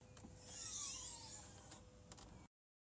hydrolic hatch bip
atmos, general-noise, atmo, squeak, atmosphere, ambient, background-sound, soundscape, ambience, ambiance, background, atmospheric, whoosh